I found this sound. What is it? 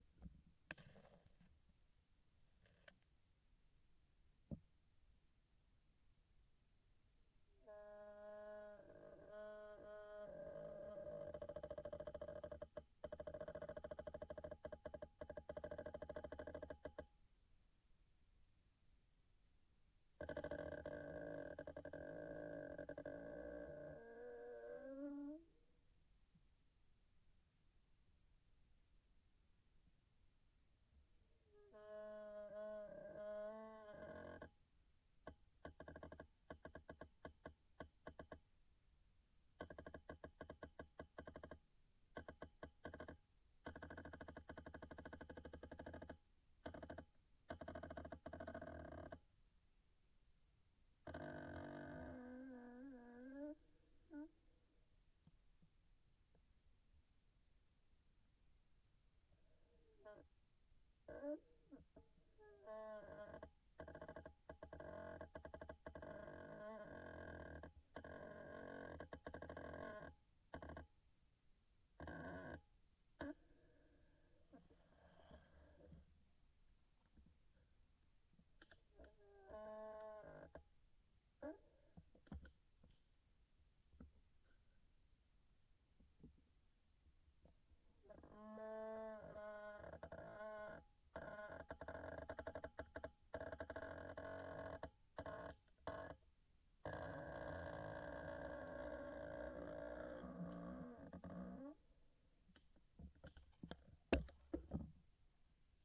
Chair Squeak
This is the recording of my chair using Mic:Korg CM300 , Recorder H6. I felt like an animal cry so thought it would help once processed. The file raw.
sfx, cm300, korg